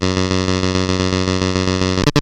modular love 10
A rhythmic bass sort of sound, but noisy and harsh made from a sample and hold circuit modulating at audio frequencies with a glitch at the end. Created with a Nord Modular synthesizer.
bass, buzz, click, digital, glitch, noise, rhythmic